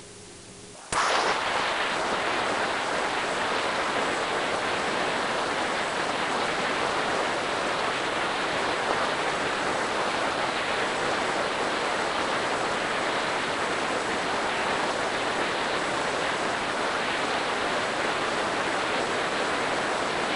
sample exwe 0264 cv fm lstm 256 3L 03 lm lstm epoch17.89 1.6674 tr
generated by char-rnn (original karpathy), random samples during all training phases for datasets drinksonus, exwe, arglaaa
recurrent, network, generative